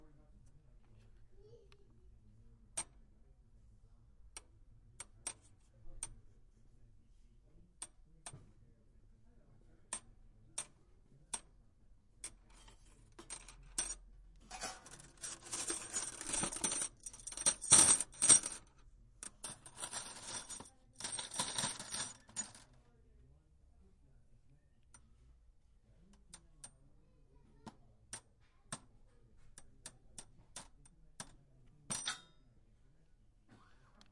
striking nails with nails at a hardware store. clinky!
one in a series of recordings taken at a hardware store in palo alto.
hardware-store, impulse, clinky-nails, metal-on-metal, pop